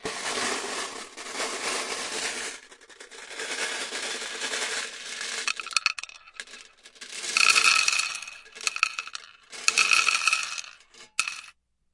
Swirling glass mancala pieces around in their metal container and dumping them onto the board.